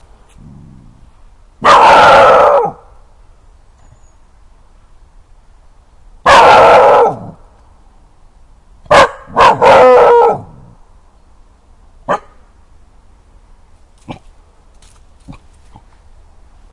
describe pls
A barking dog in the woods. Recorded with an Edirol-cs15 mic plugged into an Edirol R09.